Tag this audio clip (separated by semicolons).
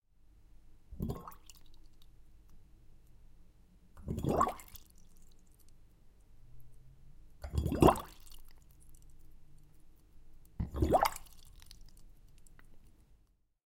bubble; bubbles; bubbling; fizzy; foley; Water